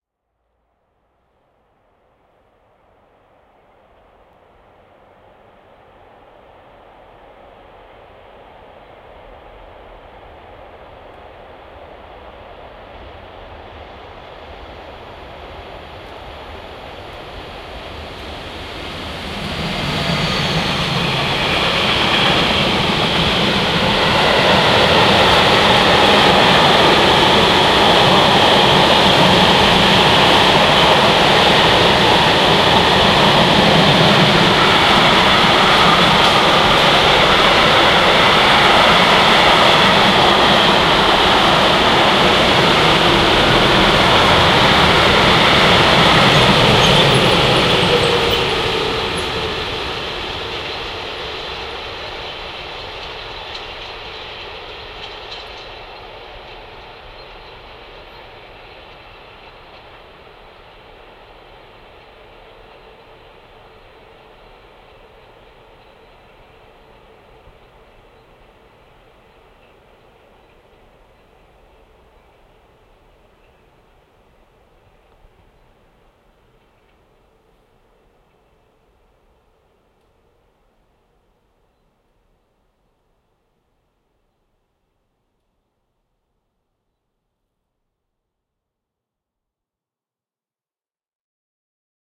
Sound of a single long freight train passing by during a quiet night (no other background noise)